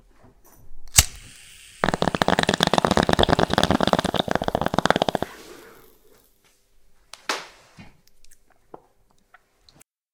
Taking a hits from the bong. Recorded with Neumann KMR 81 + Nagra Ares BB+ at 15cm.